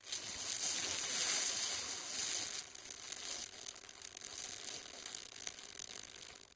Queneau Frot 02
prise de son de regle qui frotte
clang, cycle, metallic, frottement, piezo, rattle, metal, steel